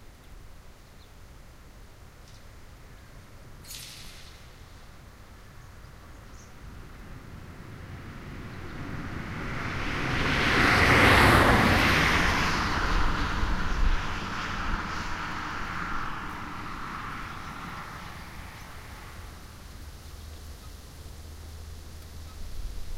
Car Pass and Horse Twang - CherryGardens
Car passes on a country road. As the car approaches a horse that had been eating the greener grass on the other side (road-side) of the fence decided to pull it's head back through catching on the wire - twang...whoosh. I stopped on the side of the road to have a break and took the opportunity to grab some binaural field-recordings. This pack just goes to show that the perfect sample is all about timing and the right conditions. That I never got, here a horse that was eating some grass spoiled it. But it’s accidents like these that are also good.